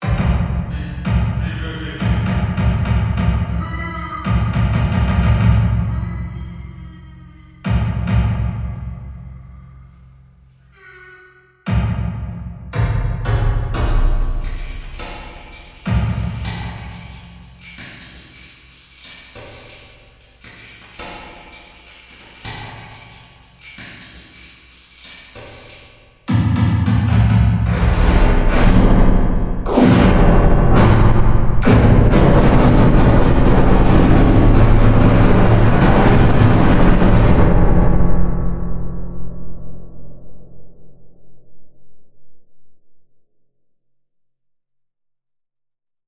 Me screaming and annoying sound, with reverb in FL Studio